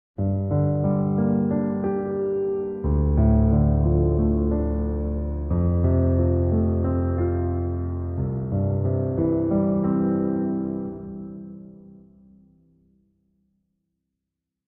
cinematic grand piano mess gdfc
more messing about on a piano in G D F C